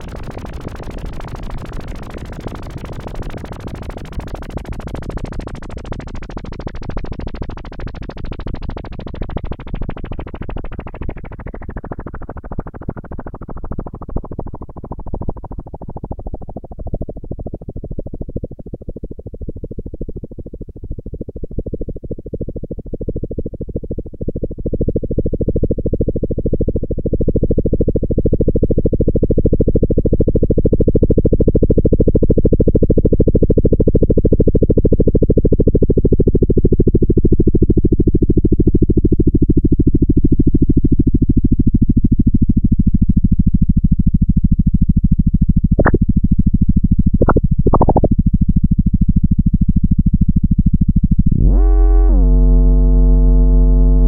ARP 2600 LFO Play
Samples recorded from an ARP 2600 synth.
More Infos:
analog, arp, arp2600, electronic, hardware, noise, sound, synth